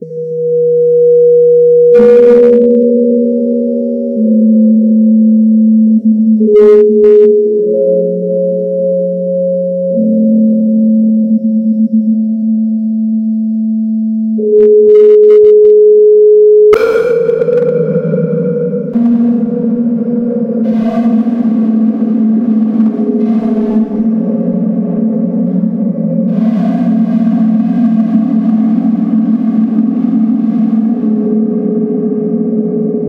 A quiet 30 second track that in some place has a short
motif or melody. Beginning with a 90 second sine wave,
and the wave was repeated, each time the repeated section
subjected to equalization shifts, in this case the Audacity
equalization module where I made modified the initial flat
equalization curve. Different from the multi-band EQ, the
changes are made manually. Followed by changes of pitch,
and also the comb filter which changes the length of
band separation. The last portion of the track has been
harmonically modified. The whole 90 second track was
made of copied or repeated sections and then
has been sized down to 30 seconds by increasing tempo--
shortening the track from beginning to end.